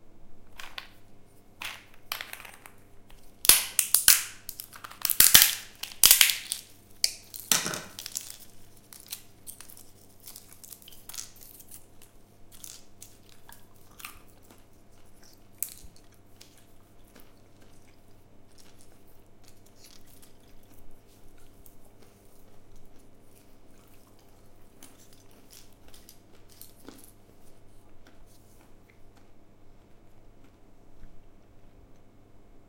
The process of picking up a dry nut from a bowl of nuts, crushing it with a nutcracker, taking the seat out and eating it. Recorded from a close distance with a Sony PCM-D50.
dry-nut, eating, nut, nutcracker